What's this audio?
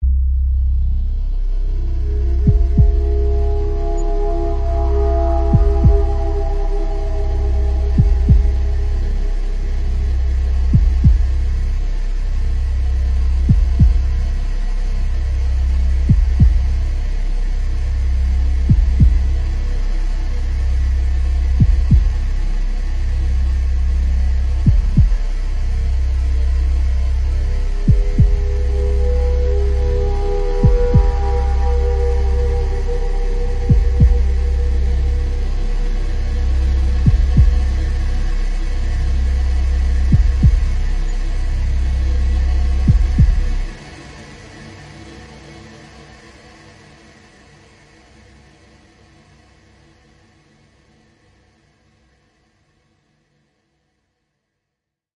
Horror Texture 4
Produced in FL Studio using various VSTs